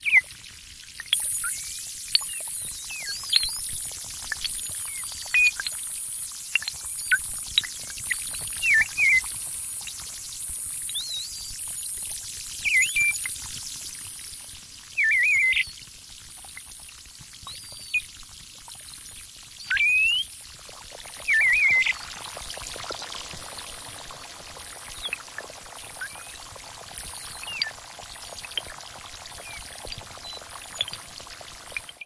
Sounds made with a free VSTi called Oatmeal by Fuzzpilz, i like to try to imitate nature with synths, this sample is 100% synthesis, all made in Jeskola Buzz.
water, birds